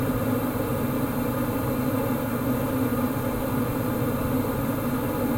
Oil burner blower loop
Blower fan loop of an oil burner used in central heating systems.
loop, blower, machine, furnace, start-up